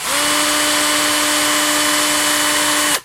Nut Gun Very High 1
Bang, Boom, Crash, Friction, Hit, Impact, Metal, Plastic, Smash, Steel, Tool, Tools